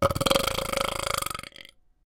A longer burp with a bit of a wet edge.
A studio recording of my friend Cory Cone, the best burper I know. Recorded into Ardour using a Rode NT1 and a Presonus Firepod.
burp, belch